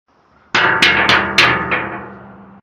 vent crawl
crawl, dev, fnaf, gamedev, games, homemade, vent, ventcrawl